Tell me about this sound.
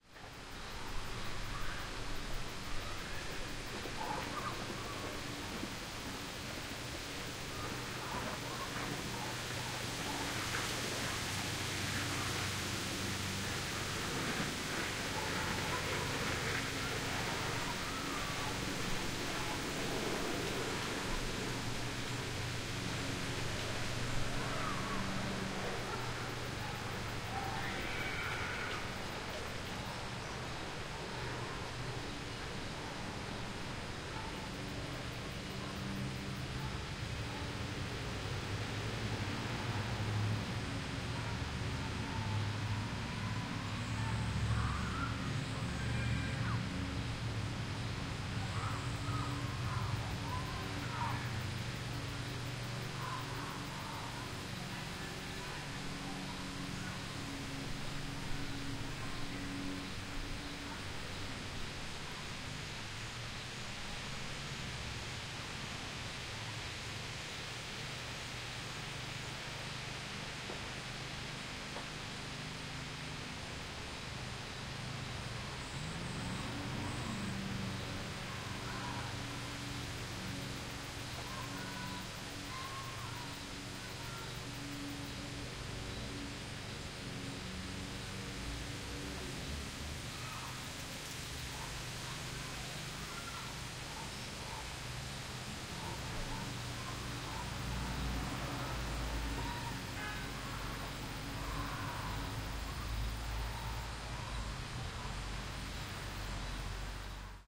LateAfternoonSept12th2015KidsPlayingBackground
A recording made at 4:30PM ON Saturday September 12th 2015 near a playground in a small town. A cool front had recently passed bringing with sharper temperatures and northwest winds sifting through the still green cholorophyll-laden leaves. You hear the sound of children fairly low in the background as they enjoy the playground.
True stereo recording made with Maantz PMD661 and TWO Sennheiser ME-66 Microphones mounted in tripods.